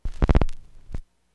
The sound of a stylus hitting the surface of a record, and then fitting into the groove.
analog, needle-drop, noise, record